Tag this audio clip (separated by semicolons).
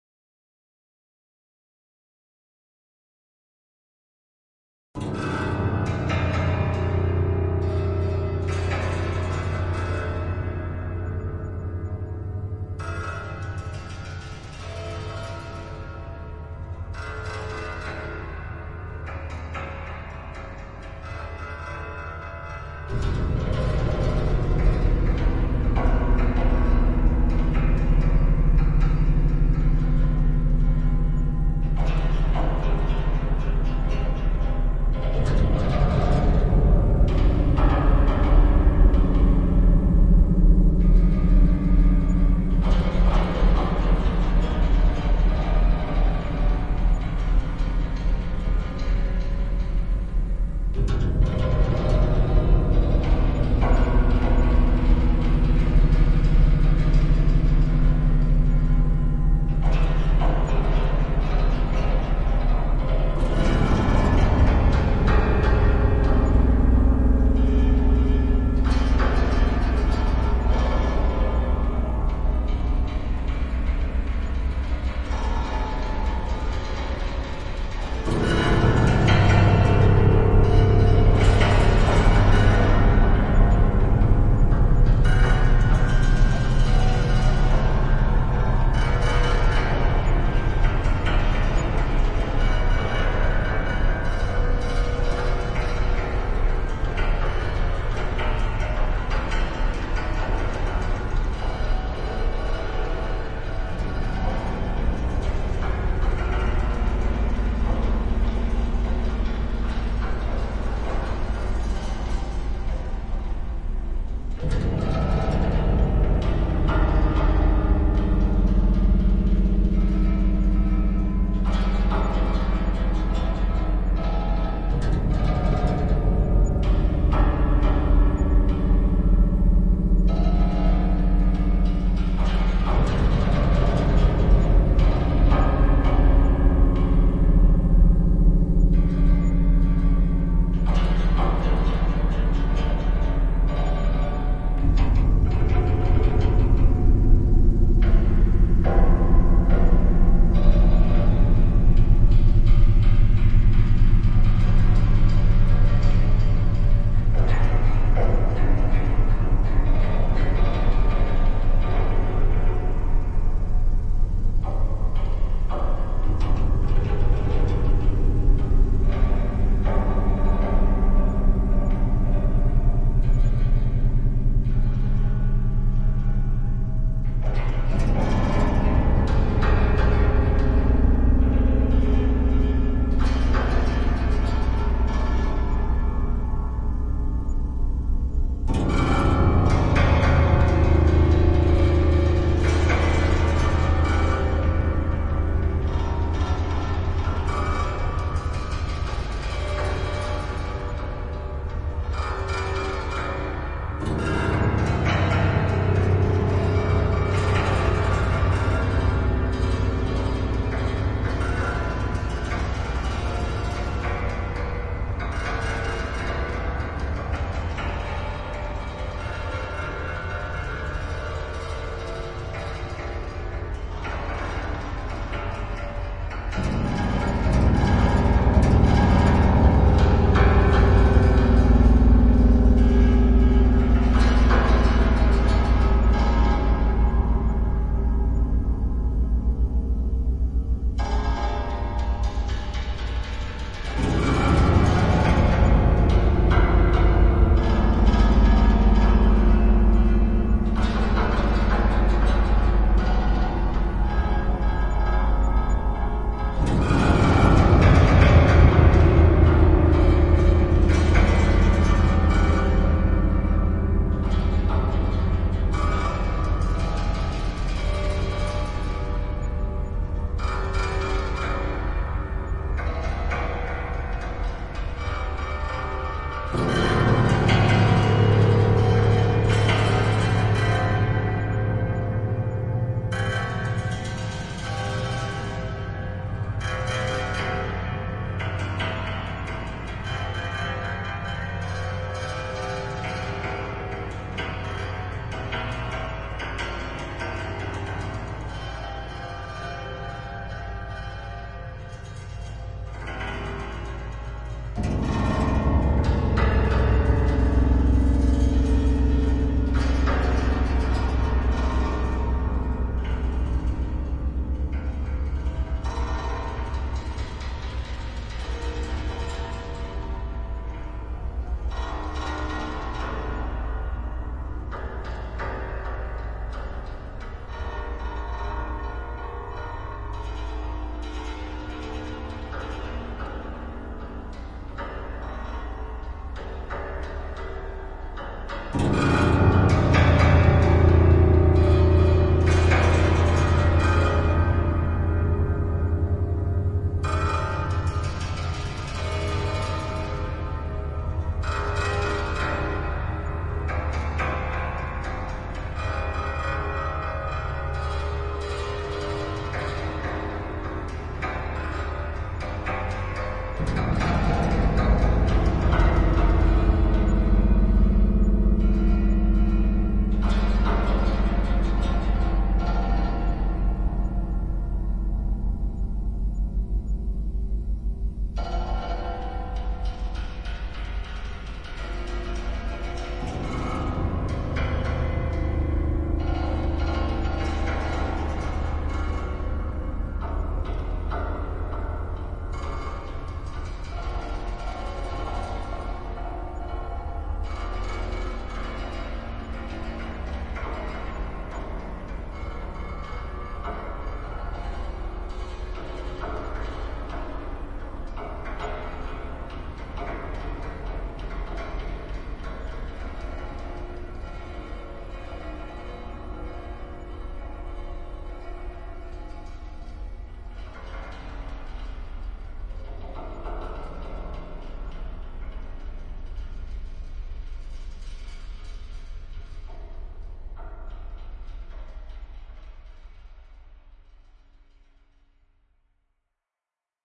extended-piano; melodic-fragment; atmospheric; piano; detuned; scraped; rubbed; soundscape; prepared-piano; nightmare; struck